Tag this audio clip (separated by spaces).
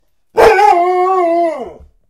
animal bark barking dog growl growling labrador pet